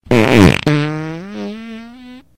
This has to be my most impressive one yet!After effects from a major meal at an authentic mexican restaurant with the hottest peppers etc I've ever encountered. I suffered from this for a week.
fart, gas, flatulence, wind